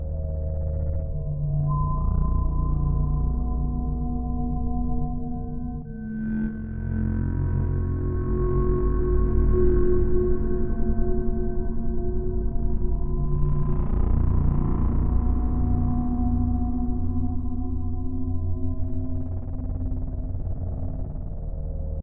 Light melodic drone 1
ambient, light, soundtrack